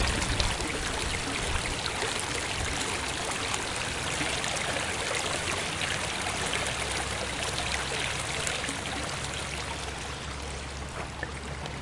Floriade 2013 - Small waterfall
Floriade in canberra 2013 - lots of people looking at flowers, taking photos talking, walking, some small rides, bands in the park, a old time pipe organ
over, stream, a, small, drop, falling, water